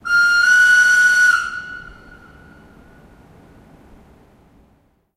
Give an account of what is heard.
This is the typical Swiss train whistle.